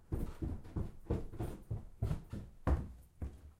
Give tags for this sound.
downstairs; footsteps; walking

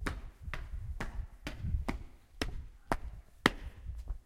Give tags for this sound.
Germany; School

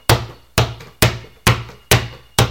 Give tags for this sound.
ball; bounce; Bouncing

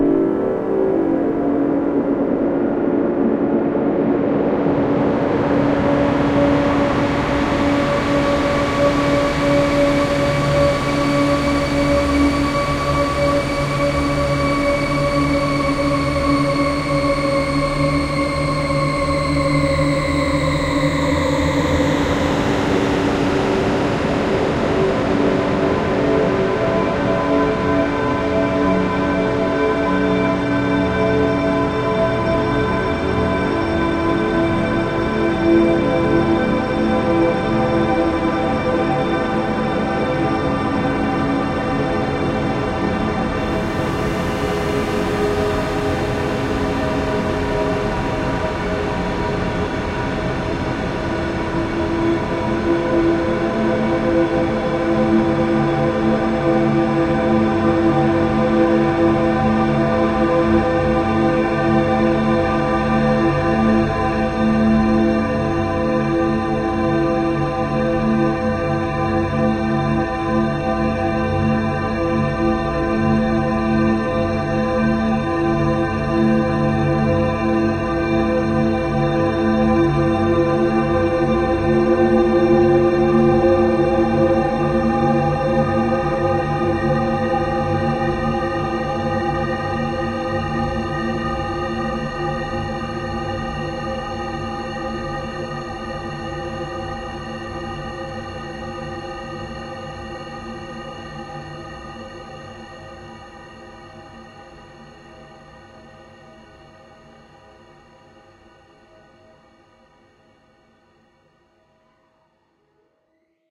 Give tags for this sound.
ambiance; ambiant; ambience; ambient; angel; angelic; demon; demons; devil; evil; god; heaven; hell; horror; lucifer; realm; satan; soundscape; spirit; spiritual

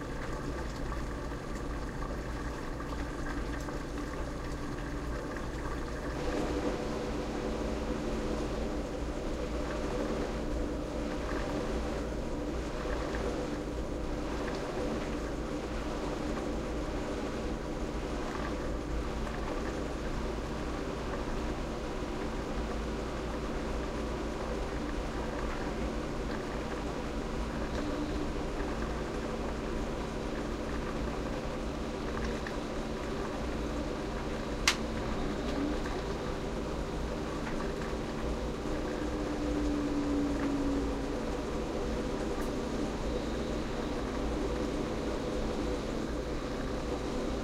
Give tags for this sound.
machine; mechanical; sounds; washing

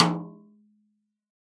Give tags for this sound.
drum; snare; 1-shot; multisample; velocity

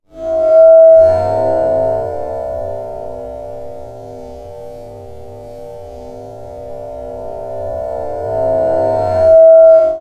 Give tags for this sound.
resonance; fx; time-stretched; processed; metal; nickel; rubbed

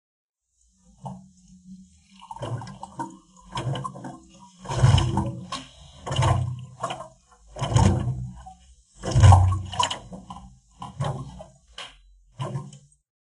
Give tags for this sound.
bottle; movie-sound; sound-effect; water

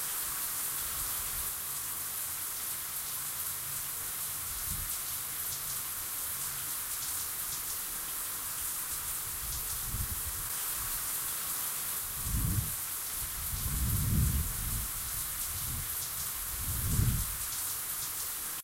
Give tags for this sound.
Rain; loop; Thunder; wind; storm

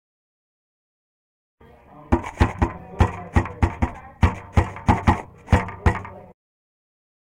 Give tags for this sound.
artistic; experimental; futuristic

